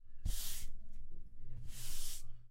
16. Manos rozando madera
touch of hands on wood
hands, wood